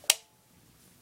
light switch
Just pressing a switch to turn some lights on (or off).